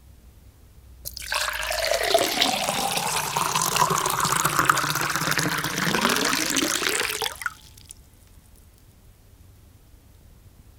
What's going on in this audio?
Water poured into a glass. A selection of 4 microphones and 3 different pour rates but labelling has been lost! Microphones were: Beyer MCE86, Samson C03 on super-cardioid, Behringer C2, AKG C1000 on hyper-cardioid. All the same placement (selected for splash, glass ring and bubbles); Behringer UB802 mixer and Zoom H1 recorder.
glass, liquid, pour, pouring, splash, trickle, water